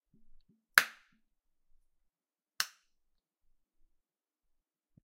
A simple light switch being turned on and off.